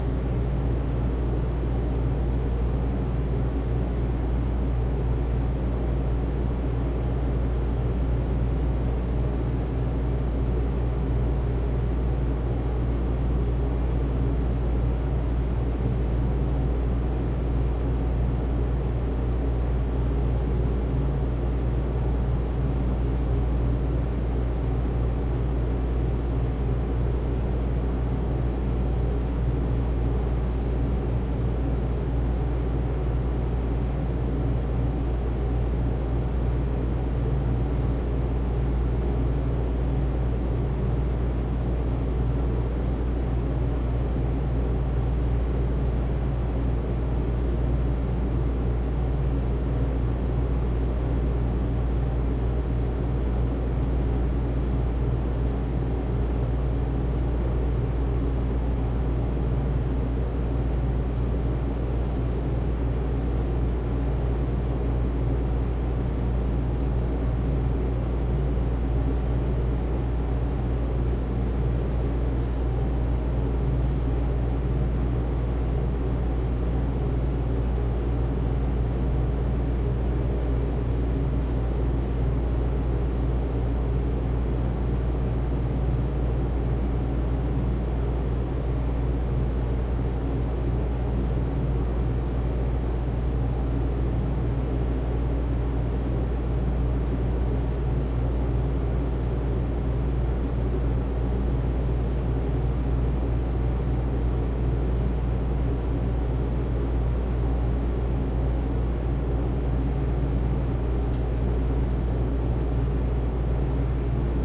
room tone office hallway small bassy wider
bassy, hallway, office, room, small, tone